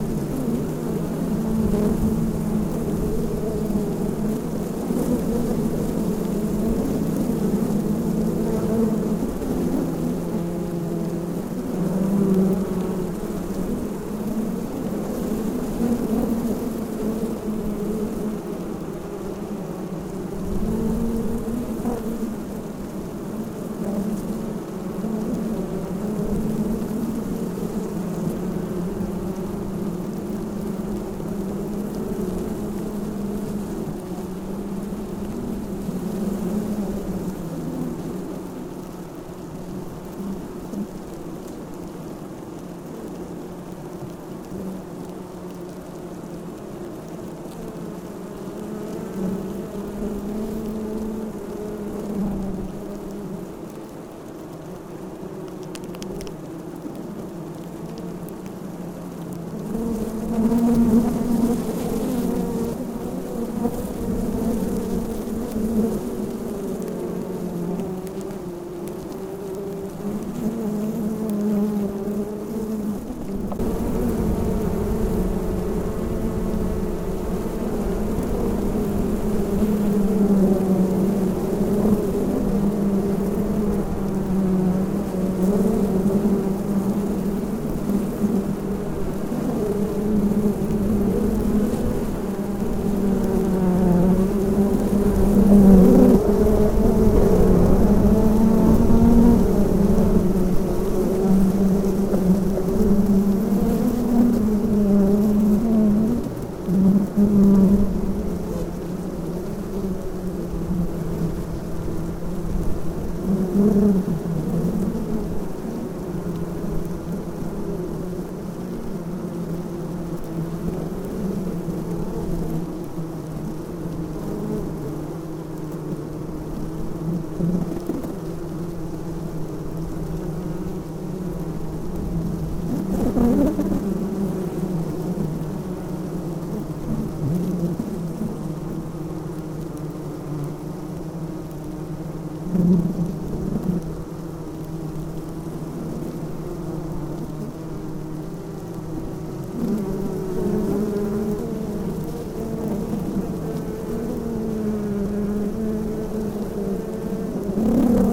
1500 bees in a box
1500 bees in a coroplast box ready for transport to their new hive. There was a screen on the side of the box, I placed the dr-100 next to it for close-mic action.
bees, insects, field-recording, hive, bugs